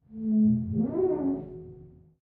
mirror resonance 6
Recording the procedure of cleaning a mirror inside an ordinary bathroom.
The recording took place inside a typical bathroom in Ilmenau, Germany.
Recording Technique : M/S, placed 2 meters away from the mirror. In addition to this, a towel was placed in front of the microphone. Finally an elevation of more or less 30 degrees was used.